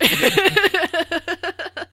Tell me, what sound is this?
just another laugh
laugh, female, real